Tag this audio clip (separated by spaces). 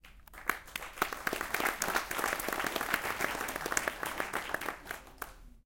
audience people theatre applause theater